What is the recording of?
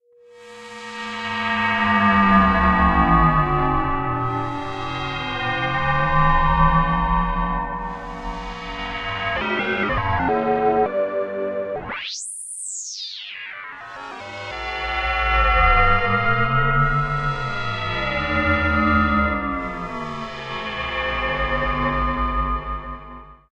Testing multiple runs through minihost render for added noise or artifacts.
processed, sound